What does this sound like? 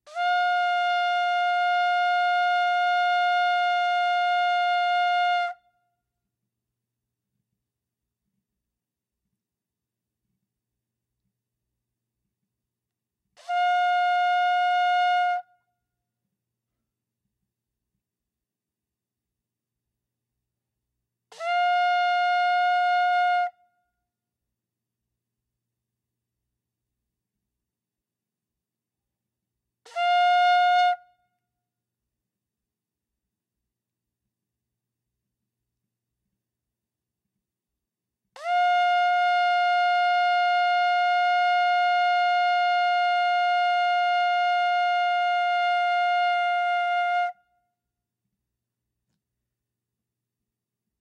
Small Shofar
An 18" ram's horn shofar being sounded from a few feet away. Three long blasts.
Recorded on a Zoom H4n using an Earthworks SR69
horn, instrument, jewish, middle-eastern, shofar, sound-effect, unprocessed